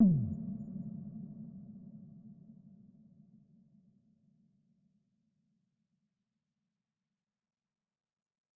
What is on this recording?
Decent crisp reverbed club kick 9 of 11
9of11, bassdrum, club, crisp, kick, reverb